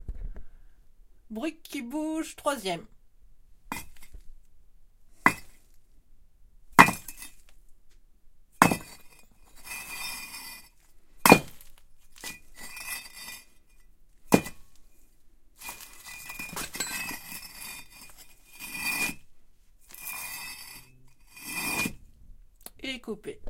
Grosses briques2
two bricks rubbed against each other with some tick on.
bricks, big-bricks